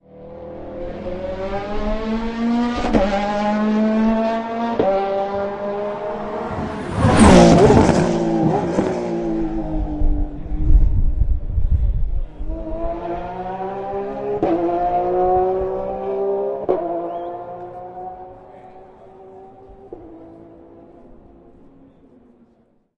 A sudden approach of a high speed car braking hard at a chicane